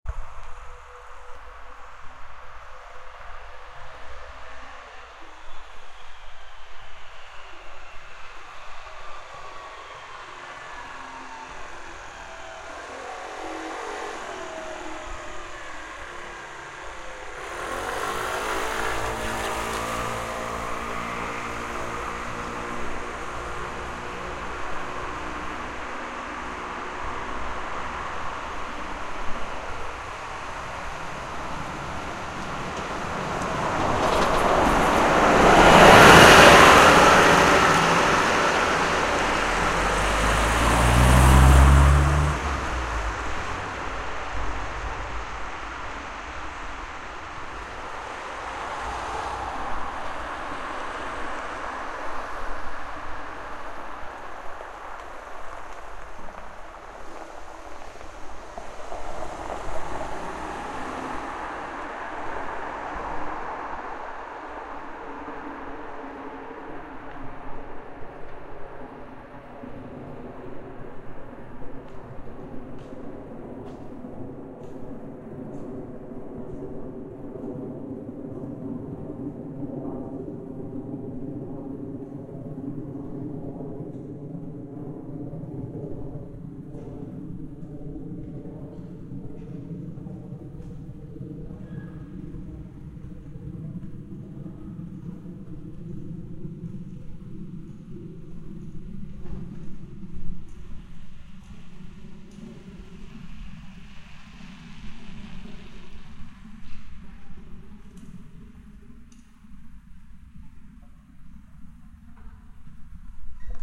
Stadt Bus Flugzeug German City
City Bus Airplane
Airplane, Bus, City, Flugzeug, German, Stadt